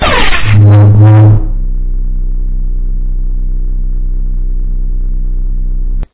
Light saber ignition, swings and idle.
Made using mic scrape on desk, human voice, mic feedback and digitally generated/manipulated hum.